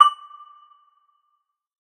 This is the third in a multisampled pack.
The chimes were synthesised then sampled over 2 octaves.
This is the note D.